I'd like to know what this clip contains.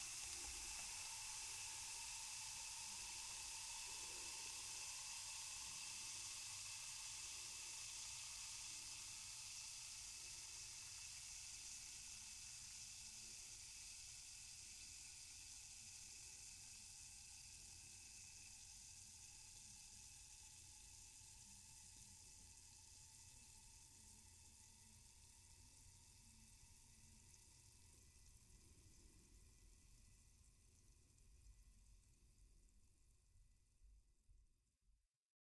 Alka Seltzer effervescent tablets sizzling
Alka-Seltzer tablets fizzing in water and fading out. Does not include the initial drop of the tablets into the water.
Recorded with a Neumann TLM 104.
water, sizzling, soda, alka-seltzer, medicine, effervescent, carbonated, sizzle, fizzle, fizz